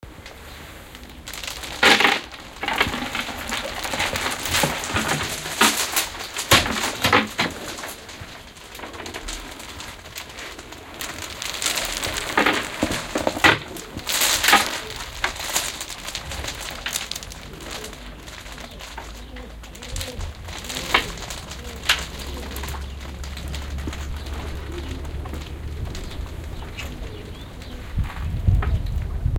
2013-04-14-binaural-test-2
Binaural recording (rustling with curtain made of wooden beads) with OKM mics.